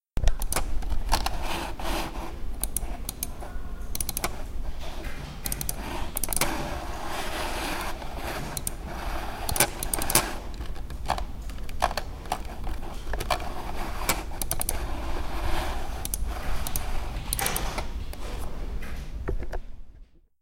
Mouse PC
Sound produced when move the mouse for the table and click the mouse. This sound was recorded in a silent environment and the recorder was near to the source.